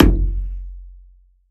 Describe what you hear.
WATERKICK FOLEY - HARM 05
Bass drum made of layering the sound of finger-punching the water in bathtub and the wall of the bathtub, enhanced with harmonic sub-bass.